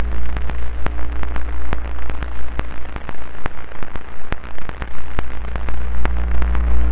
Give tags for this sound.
canvas; electronic

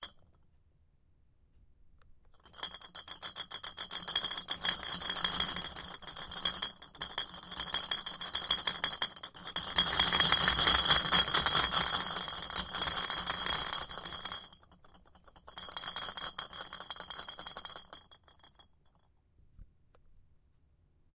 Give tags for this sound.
bottles; earthquake; glass

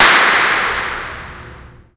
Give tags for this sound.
impulse; response; reverb

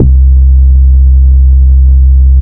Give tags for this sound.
bass; subbass

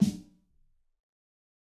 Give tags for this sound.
tune; realistic; snare; high; drum; god

